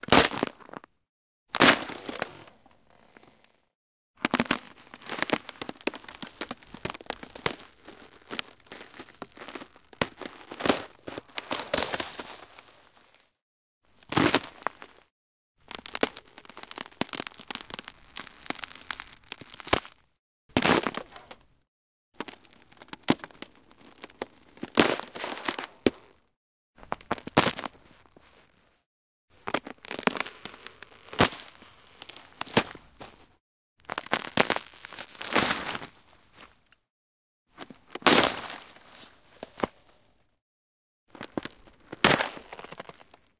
Foley Natural Ice Breaking Sequence Stereo
Sequence, Ice Breaking in Iceland.
Gear : Tascam DR-05
break, breaking, crack, cracking, crunch, crush, foley, ice, ice-crack, iceland, natural, scratch, smash, tascam, winter